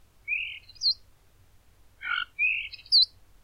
starling bird twitter
A short twittering of a starling bird.